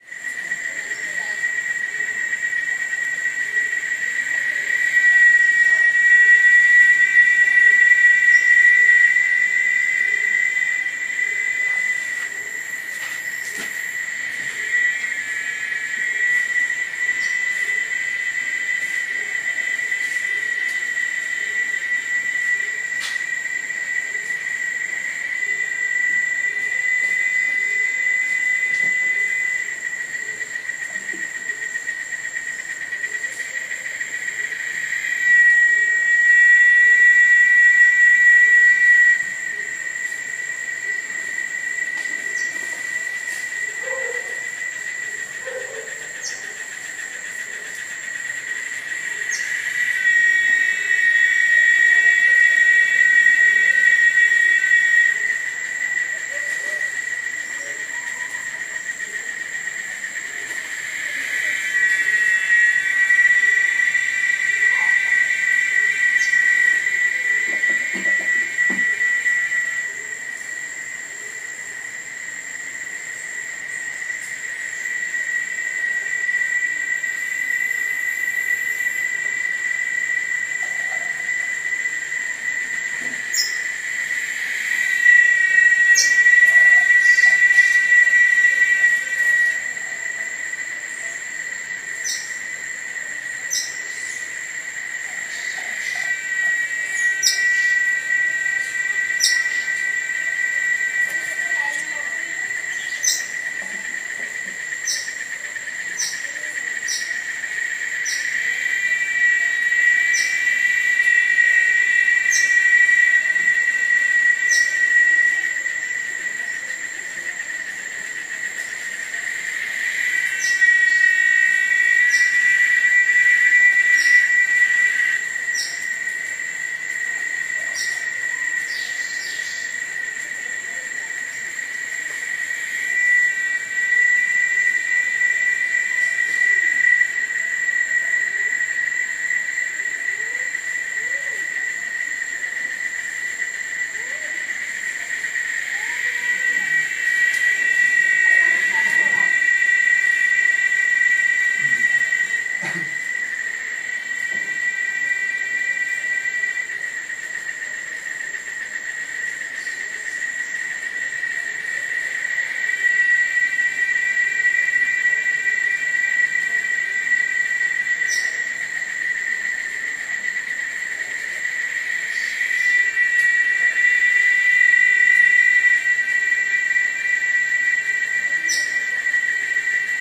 Tropical Forest Sunset Anmbient
Field-recording of a sunset in Minca, Colombia. The insect that is singing is call "las chicharras", they sing by the sunset. Record on July 2020 with Dictaphone on an iPhone 5.
SF Sounds Fiction
chicharras, Colombia, de, forest, insects, jungle, Marta, Minca, nature, Nevada, Santa, Sierra, Sunset, tropical